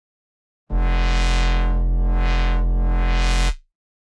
I synthesized and filtered this sound with MONARK synth. Then added distortion with Izotope Trash and flanger with Antresol.
bass, cinematic, distorted, dnb, dubstep, low, moog, sub, wobble